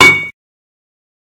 home exercise gym
home, gym